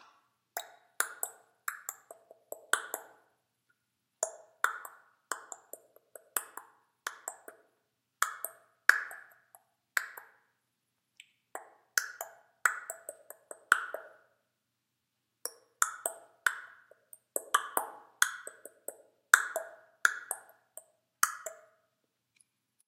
Clicking the tongue against the roof of the mouth, which changing the openness roundedness of the lips. A low value of the curve represents rounded lips (resulting in a darker click), and a high value represents open lips (resulting in a brighter click)
vocal, clicks, clicking, tongue